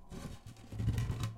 Wood Scrape Soft Close
Scraping a plank. Recorded in Stereo (XY) with Rode NT4 in Zoom H4.